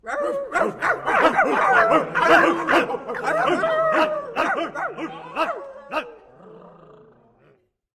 Dog sounds made by human3
These are dog sounds made by a small group of people and is very cartoonish.
bar, cartoon, dog, growl, human